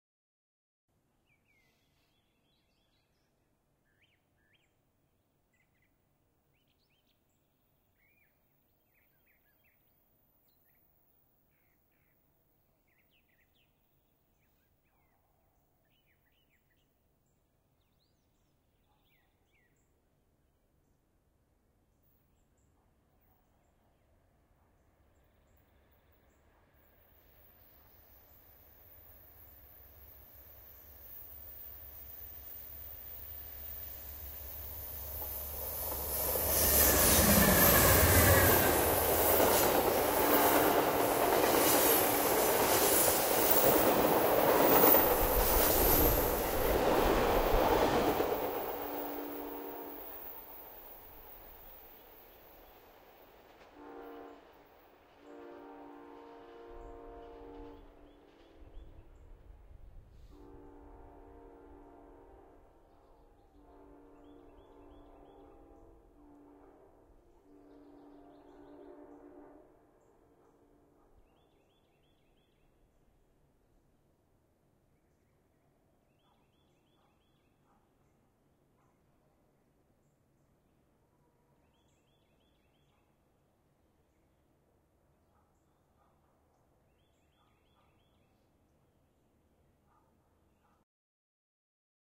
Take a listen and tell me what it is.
Long Freight Train - May 7, 2014
A recording of an Amtrak train going through Cornelia near downtown at 6:00 AM. Goes by pretty quickly.
Transportation, Travel, Public, Countryside, quick, fast